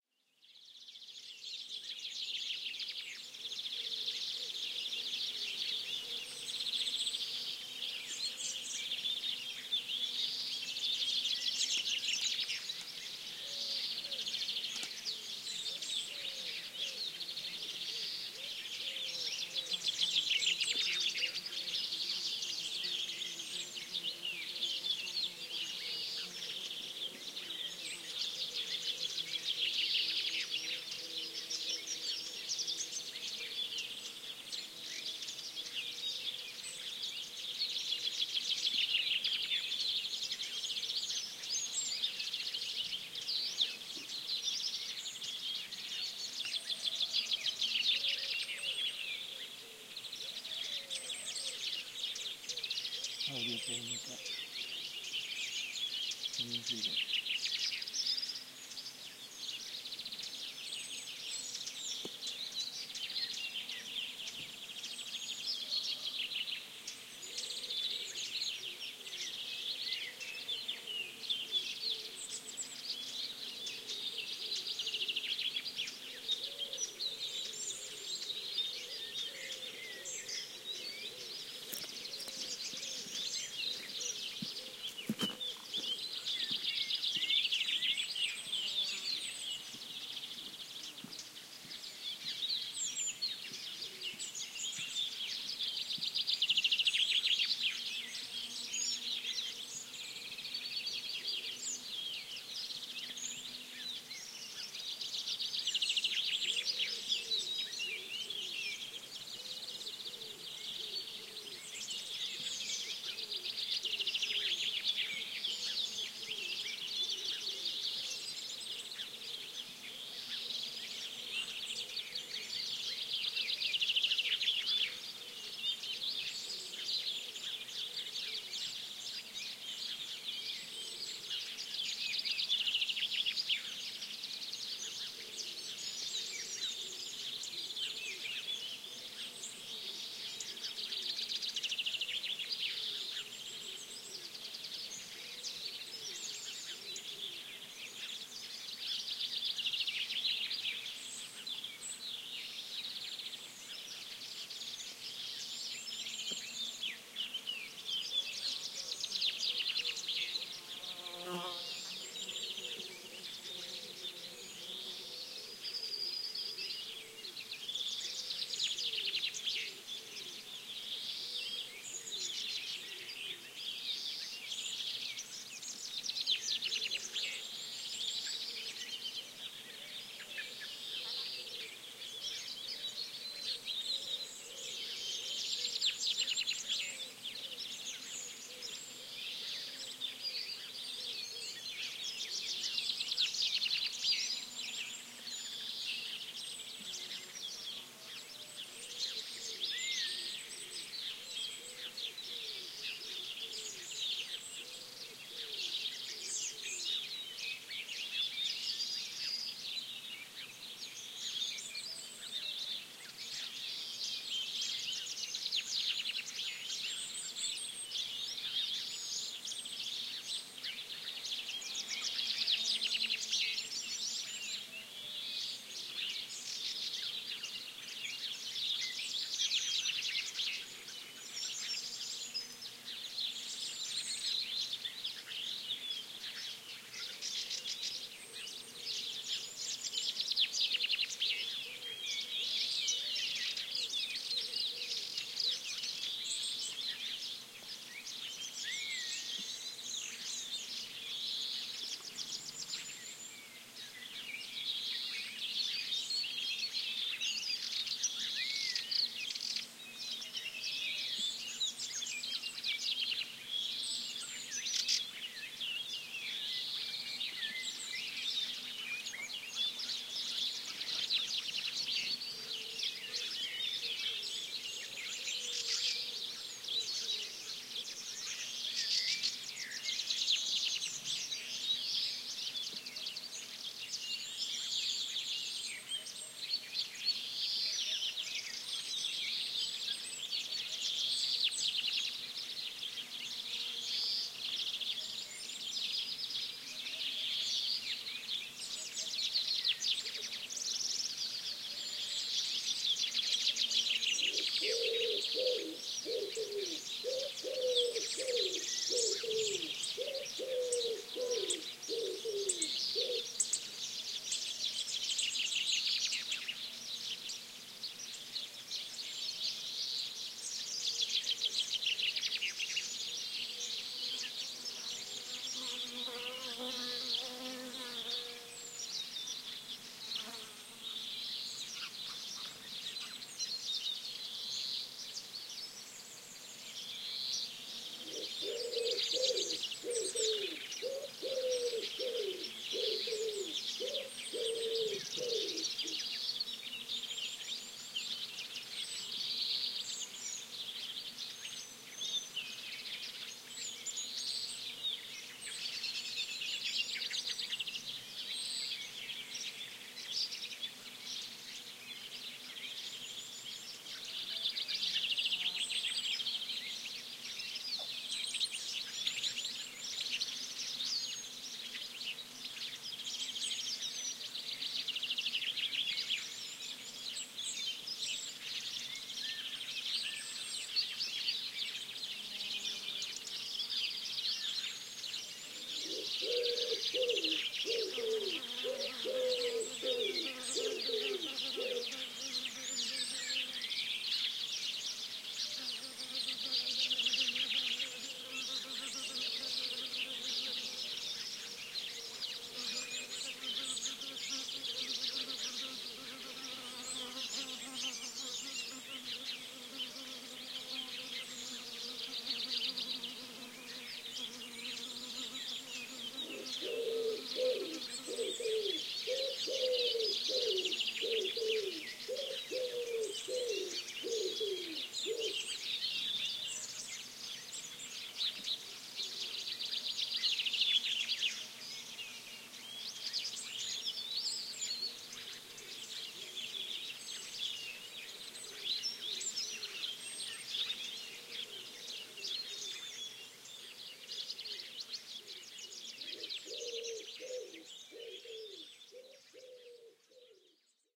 Mediterranean forest morning ambiance, lots of birds. Recorded near Bernabe country house (Cordoba, S Spain) using Primo EM172 capsules into FEL Microphone Amplifier BMA2, PCM-M10 recorder